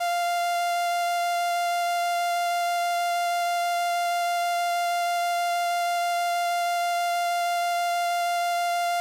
Transistor Organ Violin - F5
Sample of an old combo organ set to its "Violin" setting.
Recorded with a DI-Box and a RME Babyface using Cubase.
Have fun!